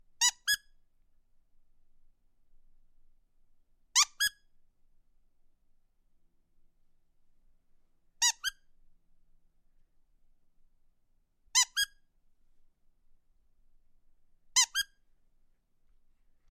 A dog squeaky toy single squeaked.